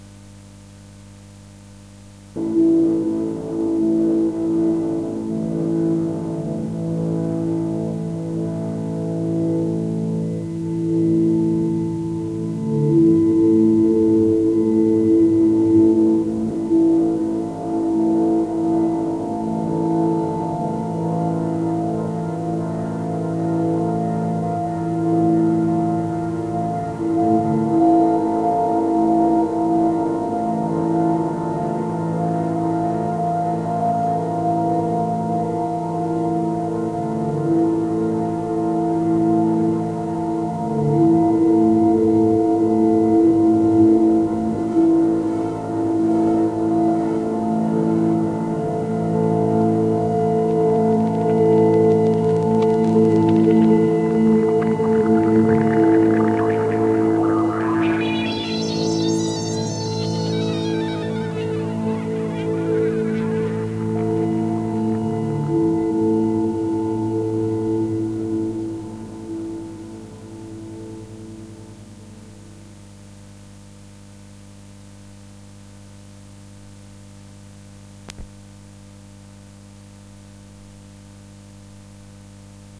Jeff 01 100 Normalized
I took the following sound created by thatjeffcarter and recorded it to cassette at different volumes.
This sound was recorded from the computer to cassette with the computer sound output volume at 100% of full volume.
The idea is to present several instances of the same sound recorded at different volumes so that the 'tape saturation' effects can be compared.
These samples are intended more as a scientific experiment than to be used for musical purposes - but of course, they can be used as such.
Sound was played from a Toshiba Satelite laptop usging the built-in soundcard (Realtek HD Audio) using Windows XP sound drivers.
Recording system: LG LX-U561
Medium: Sony UX C90 HCF (Type I normal bias 90 min). The tape was new (i.e., not used before) although it was bought around 2 years ago.
Playing back system: LG LX-U561
digital recording: direct input from the Hi-Fi stereo headphone socket into the mic socket on the laptop soundcard. Using Audacity as the sample recorder / editor.